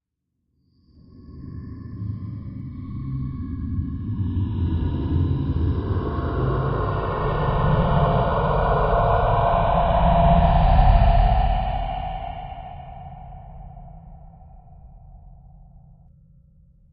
Good old unearthly roar to scare the hell out of someone.
roar, scary, unearthly